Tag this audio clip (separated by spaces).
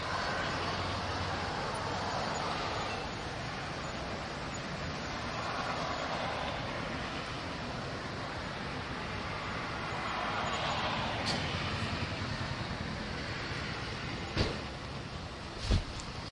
field-recording gast-station north-carolina road-trip summer travel